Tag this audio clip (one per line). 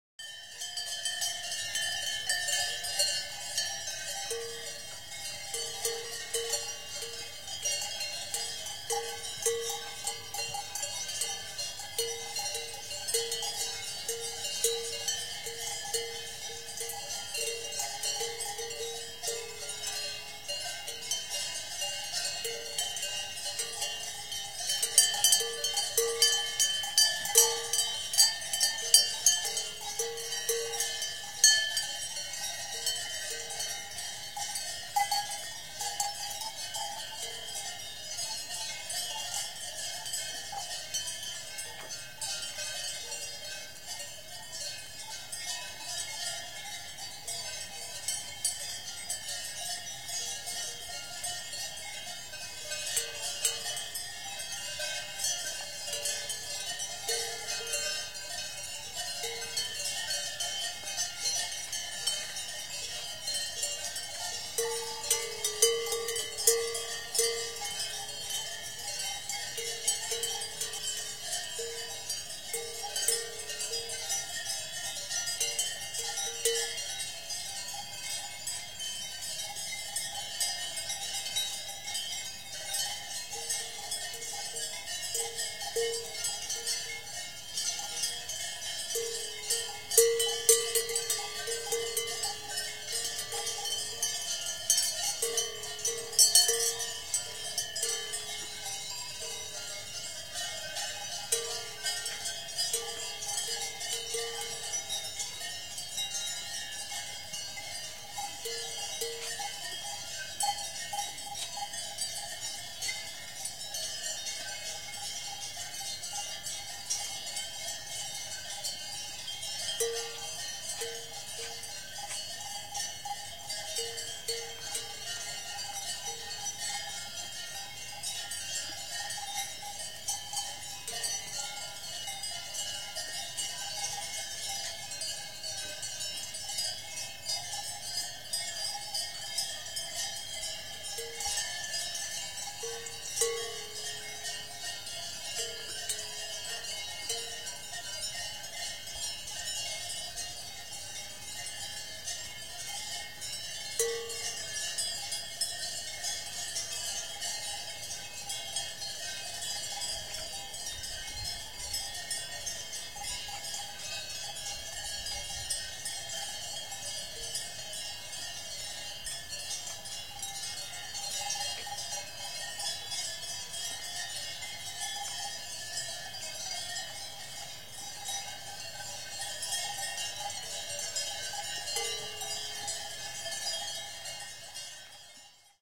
Cattle
Domestic-Animals
Eurooppa
Europe
Field-Rrecording
Finnish-Broadcasting-Company
Karja
Karjankellot
Soundfx
Tehosteet
Yle
Yleisradio